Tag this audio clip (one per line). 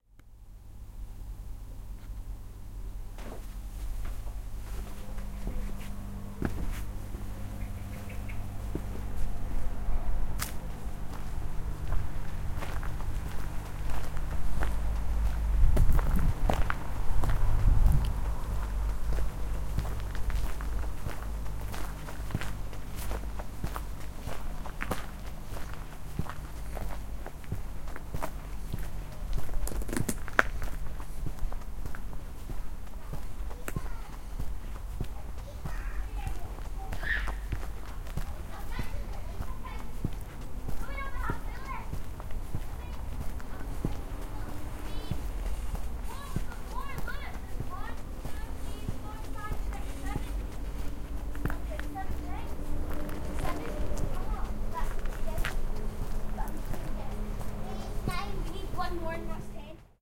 background
kadenze
lane
generator
hum
general-noise
ambient
soundscape
behind-houses
field-recording
scotland